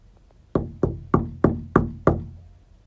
knocking on wood